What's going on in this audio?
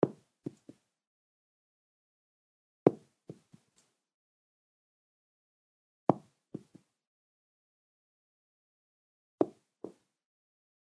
Gun-Shells on Carpet 1
Didn't see any clips for bullet shell/casings falling to a carpeted floor, so I made some. Done using 22. shells, a cheap mic and Sound Forge. This is several shells hitting the floor with space between them. Ideal for handgun.
casing-on-carpet, shell-on-carpet, Gun, bullets, casing, shells, carpet